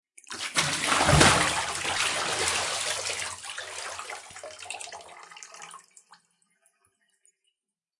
Water splash, emptying a bucket 1
I was emptying a bucket in a bathroom. Take 1.
bath, bucket, drops, hit, splash, water